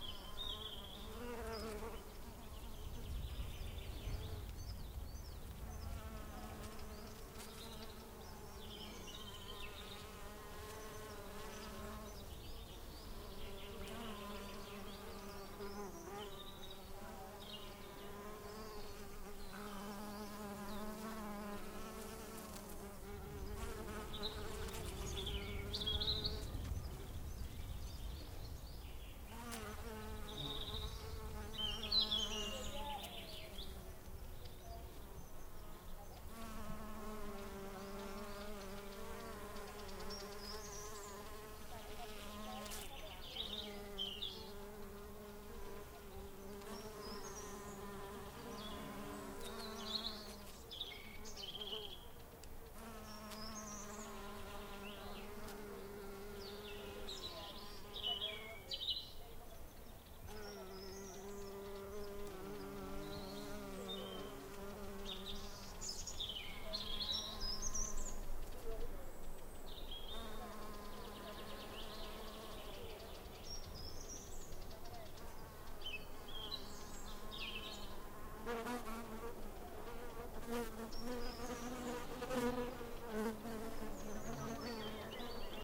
Some bees buzzing over the birds in a forest in Cataluña. It has some wind noise and you could also hear a fainted voice of someone talking far away.